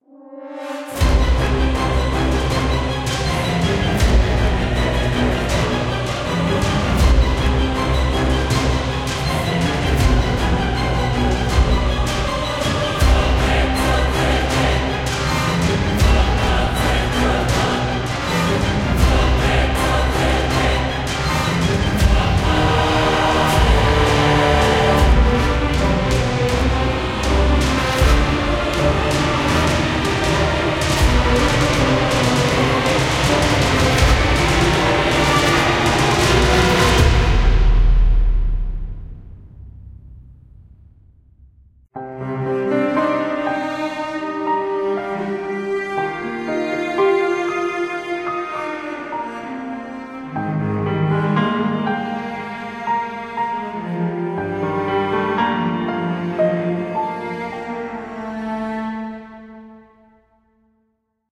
Genre: Epic Orchestra.
Track: 69/100
Sketched composition, not final.